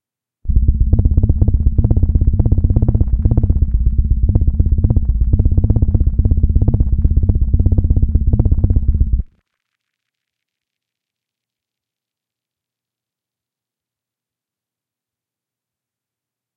Hum with attitude
Hum generated by synthesis with a little bit of an aural exciter